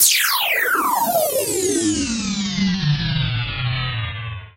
A recorded conversation, time- and pitch-stretched to give a rapid decrease of frequency leading to a low rumble.